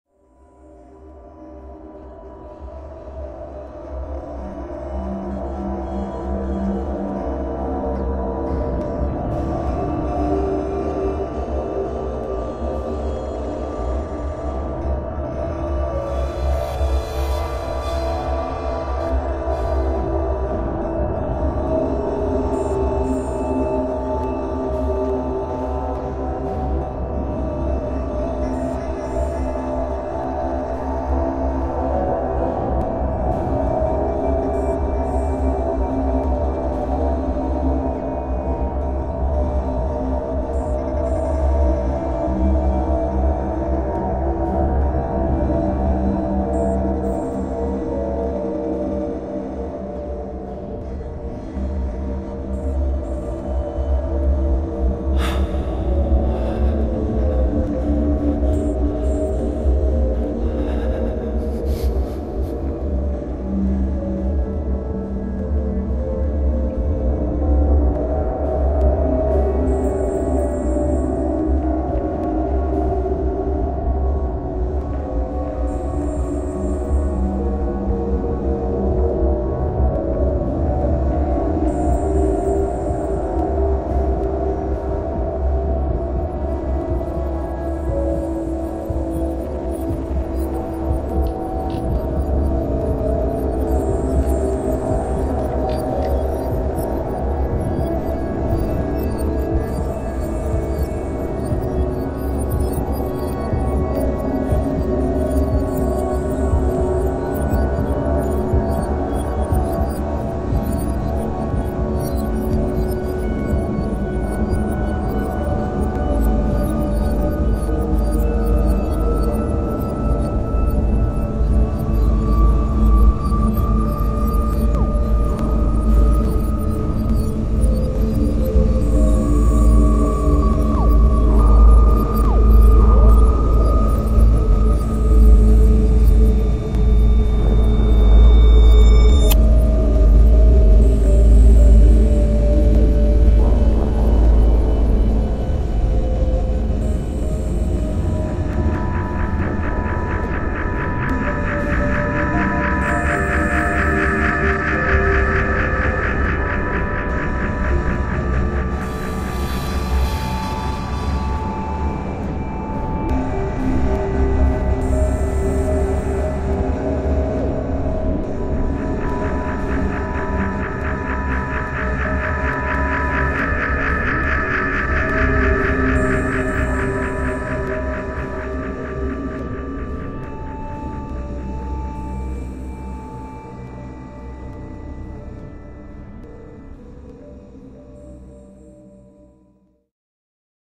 Noise collage 1
Session leftover, noise collage from filed recordings and studio.
ambiance, ambience, ambient, art, atmosphere, cinematic, dark, deep, drone, effect, experimental, film, horror, musictrash, noise, noisetrash, pad, processed, sci-fi, score, sound-design, soundesign, soundscape, suspence, synth, texture, thrill, trash